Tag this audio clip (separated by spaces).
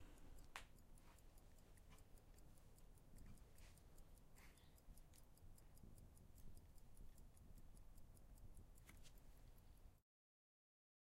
tick-tock owi time tick watch pocket-watch tic